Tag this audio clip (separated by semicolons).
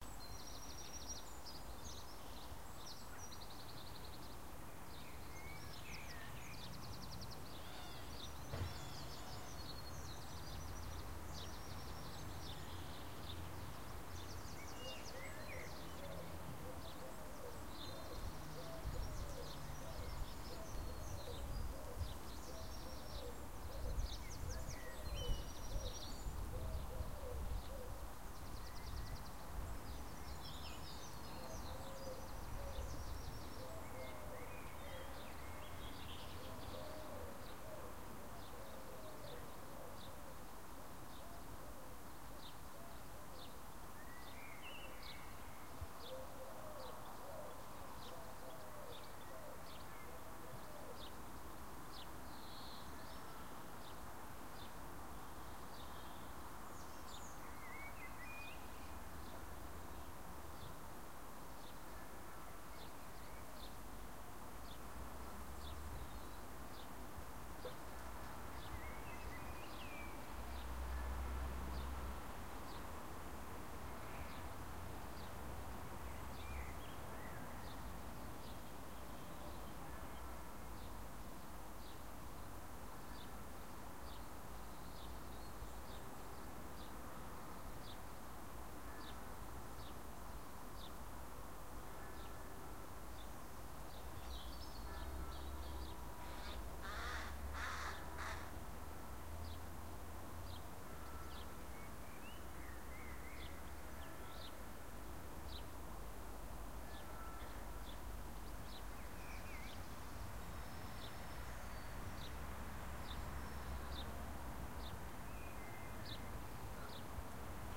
field-recording; ireland; church; nature; spring; ambiance; bells; bird; birds; garden